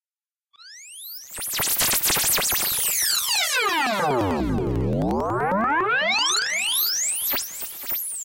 spacey rising pulse
space, synth